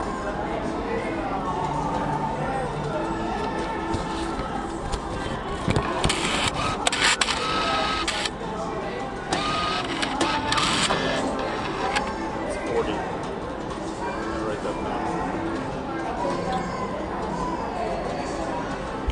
background casino music, putting money into machine, ‘that’s forty’ by player